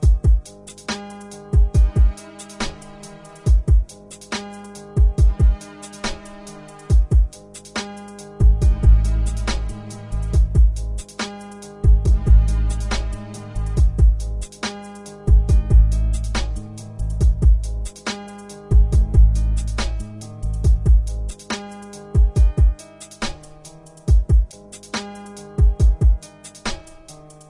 Made on FL studio 10
samples taken from "Producers Kit" downloaded separately.
Written and Produced by: Lord Mastereo
Keep it chaste!
1love_NLW
NoLyaW, Bass, RAP, Drum, Music, 10, Loop, cc